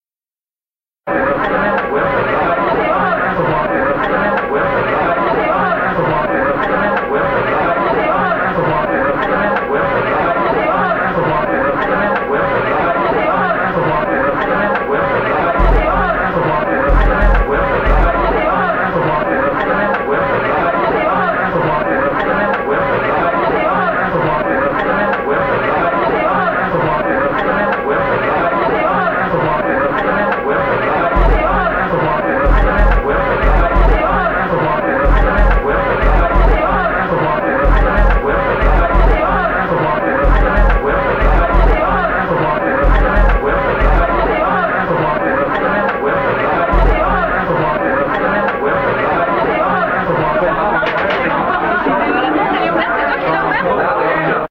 beat09-chords
Beatin' chords track
chords, people, rap, salpov